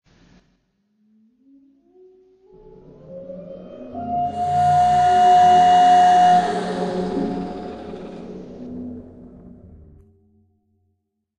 I remixed these sounds for a motioncomic I created called: Kay & Gojiah... I didn't create these from scratch, instead, took stock sounds, remixing and fiddling with them until I felt satisfied.